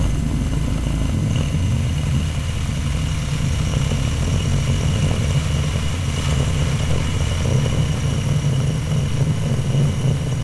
rocket engine
ambient, rocket, sound, space